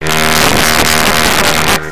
The sound of Vegetals from Surreal Memes' 'Riddle Of The Rocks'. This replica was made by distorting an audio clip so much that it became incomprehensible.